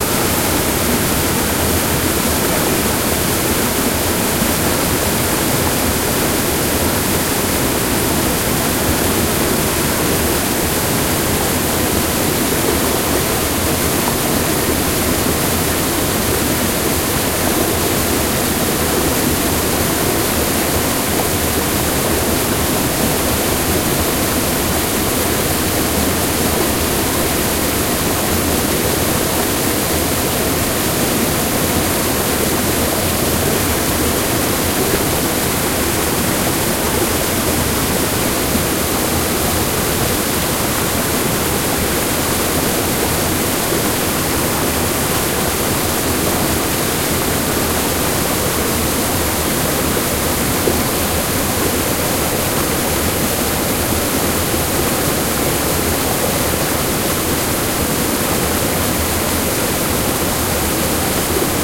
Une cascade d'un torrent.